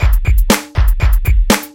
Food Beats 5
Roland MC-303 drumkit.